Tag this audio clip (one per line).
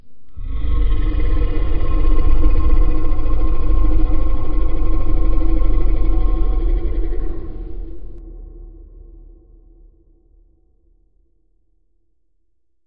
animal
beast
beasts
creature
creatures
creepy
dinosaur
dragon
groan
growl
growling
growls
horror
lion
monster
noise
noises
processed
roar
scary
snarl
tiger
vocalization
voice
zombie